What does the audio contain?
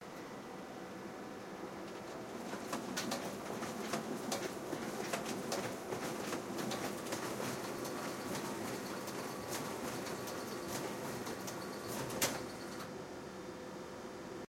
mySound GWECH DPhotographyClass photocopier
photocopier, copier, print